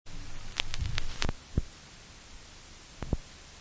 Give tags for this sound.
dirty; effects; noise; vinyl

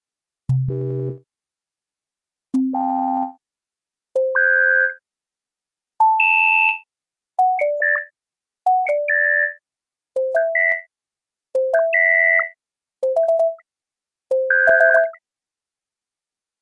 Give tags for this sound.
alert; spaceship; fire; engine; noise; emergency; signal; fx; weird; future; futuristic; sound-design; electronic; alien; starship; warning; alarm; science; fiction; sci-fi; digital; peep; call; hover; atmosphere; energy; bridge; space

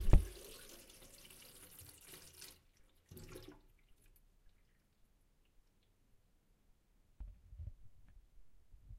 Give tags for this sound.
Turn; off; gargle; tap; water

